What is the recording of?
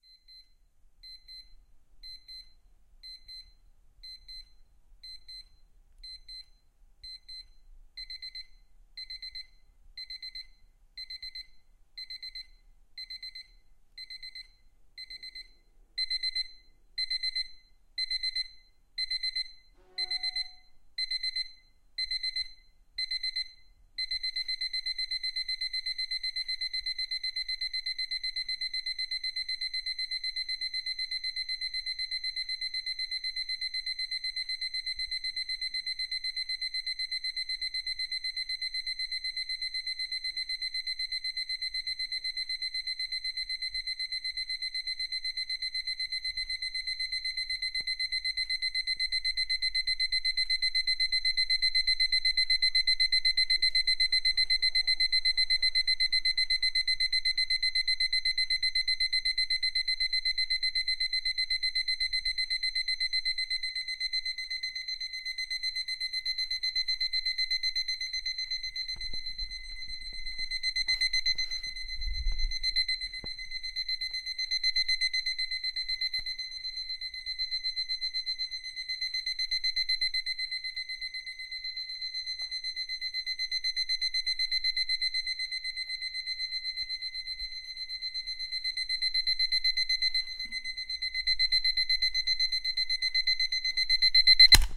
Sound taken from an old alarm clock.